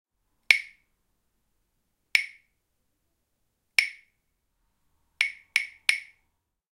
Sound of wooden claves. Sound recorded with a ZOOM H4N Pro.
Son de claves en bois. Son enregistré avec un ZOOM H4N Pro.